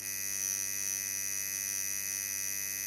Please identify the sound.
My recording of my tattoo machine for some Foley sound I needed on a personal project I filmed.